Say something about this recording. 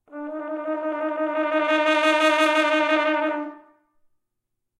horn trill crescendo D4 Eb4
A trill on the horn between D4 and Eb4 produced by moving the valve rapidly. Recorded with a Zoom h4n placed about a metre behind the bell.
brass, d4, eb4, eflat4, horn, trill, valve, valve-trill